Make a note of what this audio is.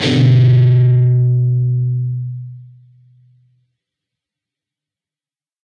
Dist Chr Bmin rock up pm
A (5th) string 2nd fret, and D (4th) string open. Up strum. Palm mute.
guitar-chords, distortion